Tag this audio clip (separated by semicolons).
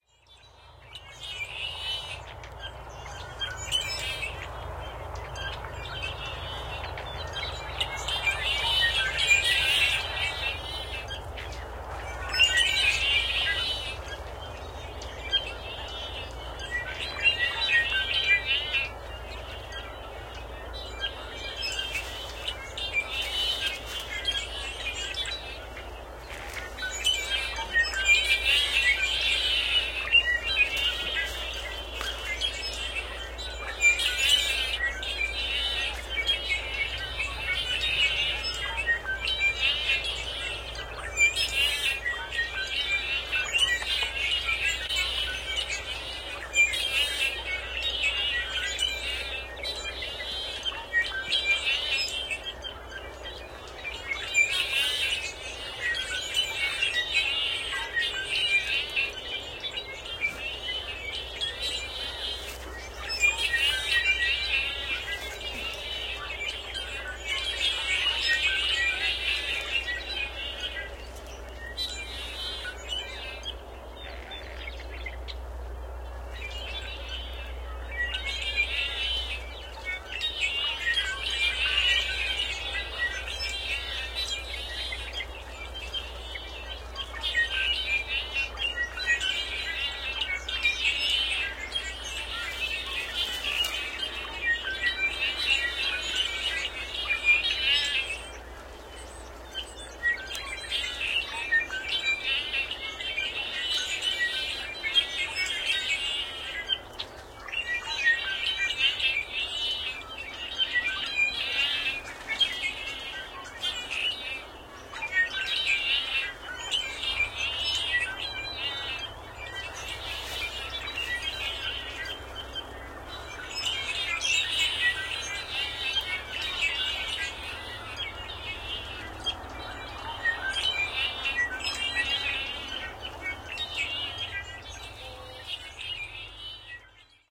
blackbirds; california; sherman-island